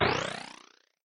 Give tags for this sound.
jump
Mario
arcade
rpg-game
game
spring

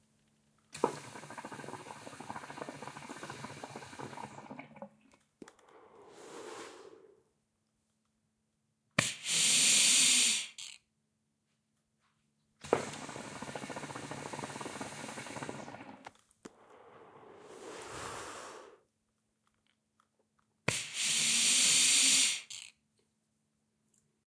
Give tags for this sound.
alfahookah
blow
blowing
hookah
shisha

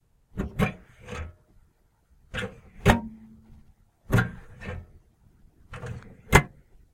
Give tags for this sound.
open
mailbox
metal
box
mail
close
clank

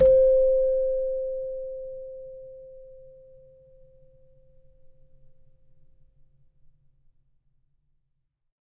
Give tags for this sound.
celeste
samples

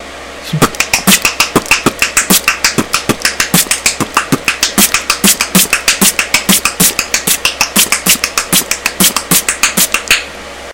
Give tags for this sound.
beatbox
click
dare-19
tongue

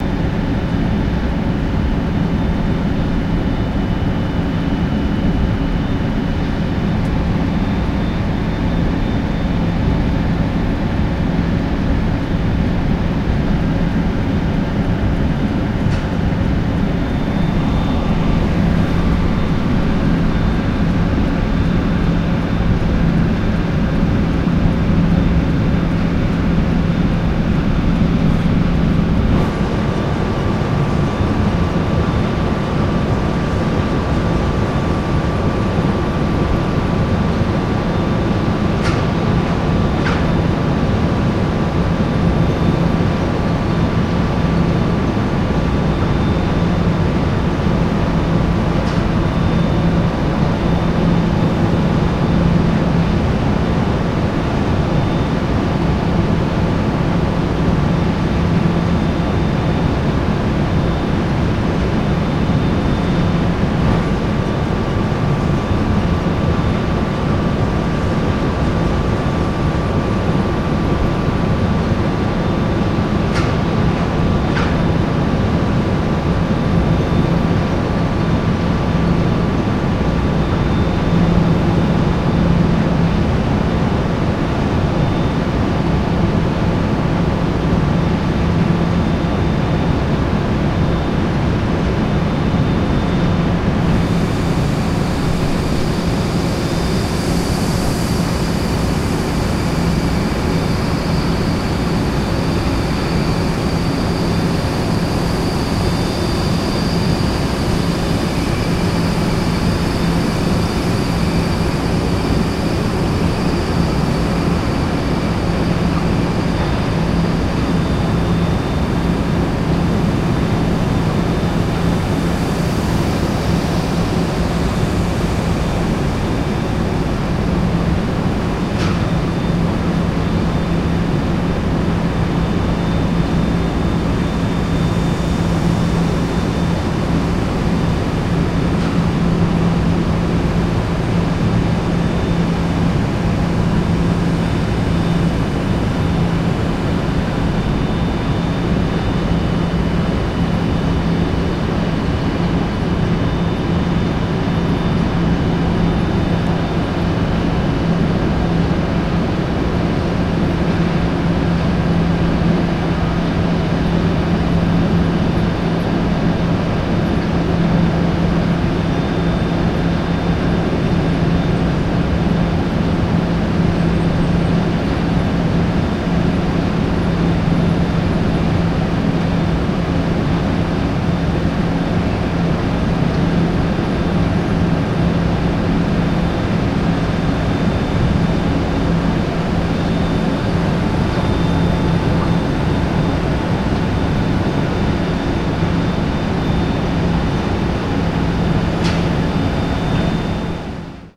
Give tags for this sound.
factory
field-recording
noise
outdoor
soundscape

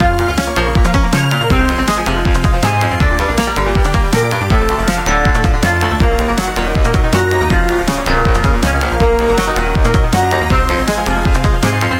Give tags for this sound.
160bpm
anime
beat
drum
groove
loop

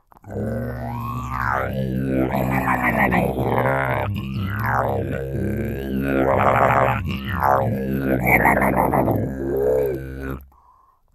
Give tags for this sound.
effect
sample
sound
Didgeridoo

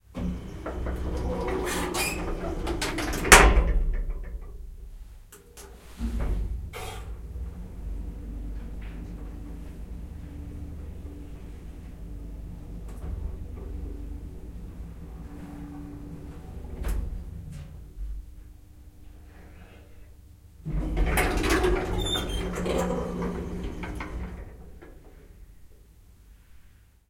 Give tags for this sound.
close
elevator
inside
machine
open
opening
russia

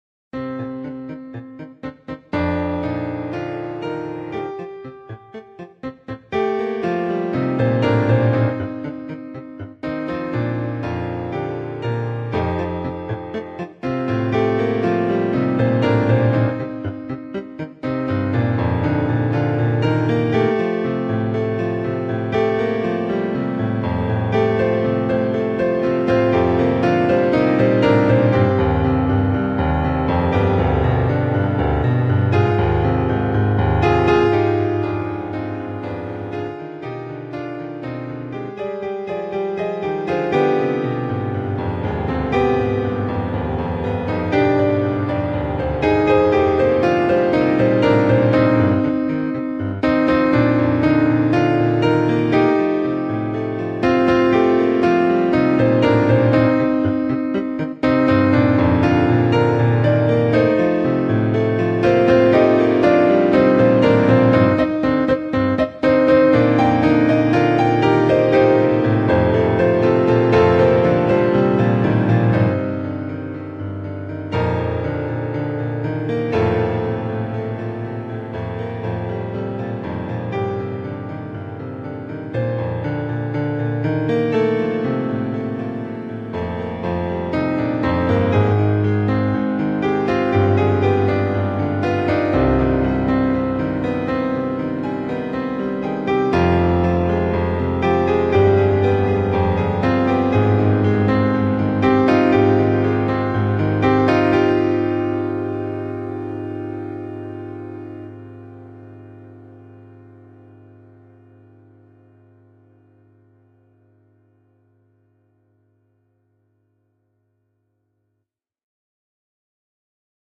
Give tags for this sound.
130-bpm
film
music
piano

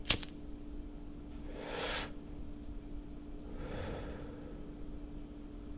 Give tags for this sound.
cigarette
lighter
ignite
smoking
zippo
exhale
smoke
inhale
flame
spark

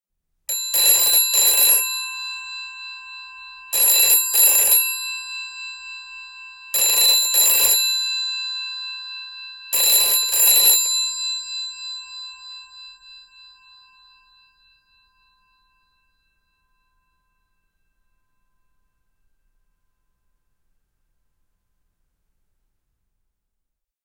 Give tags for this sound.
60s
70s
746
80s
analogue
GPO
Landline
office
phone
post
retro
telephone

